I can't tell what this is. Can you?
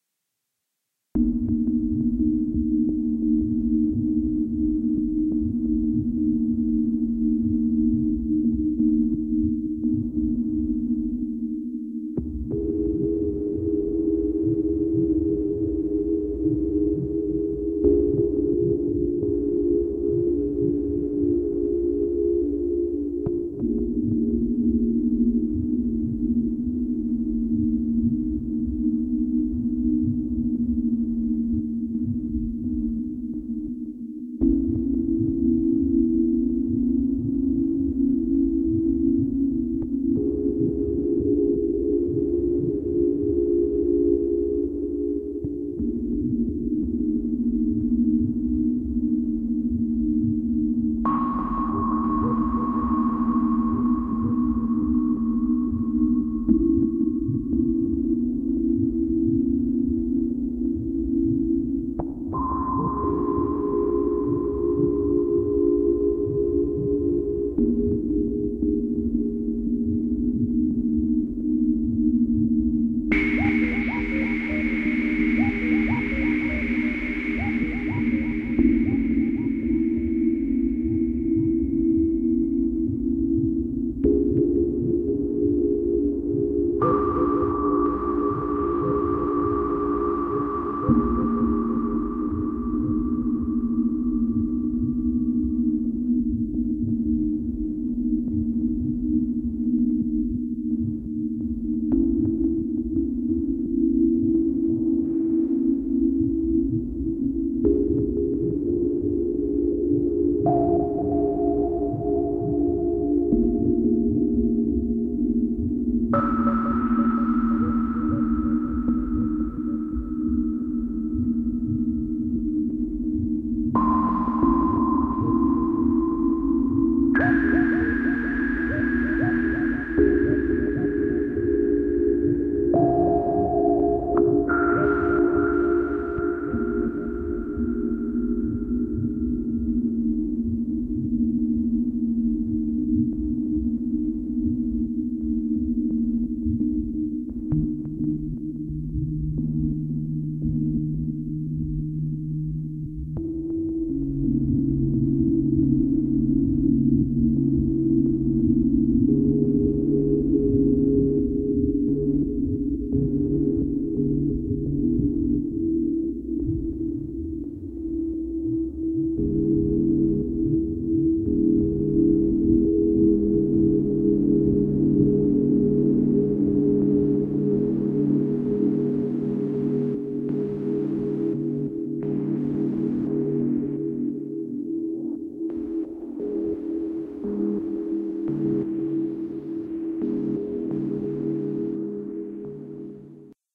Sonaresque background theme
atmospheric, background, signal, sonar